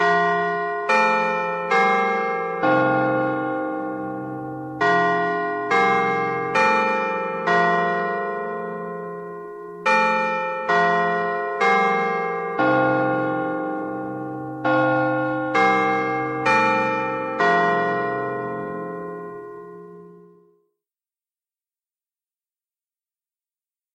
Westminster Chimes Full
Here you go. Use this with the "12 Noon Hour Bell Strike" file also in this pack, to re-create Big Ben in London England. Hope you enjoy.